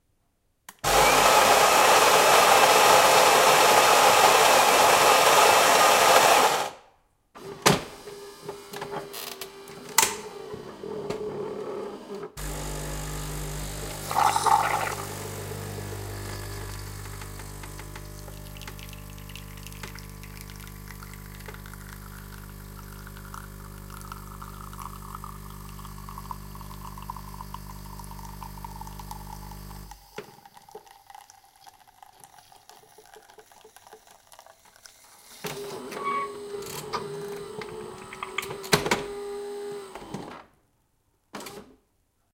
Coffee machine - Full cycle
Saeco Incanto Delux doing it's thing. Full cycle.